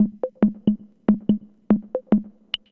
TR-77 echoes
Roland TR-77 vintage drum machine with some kind of echo.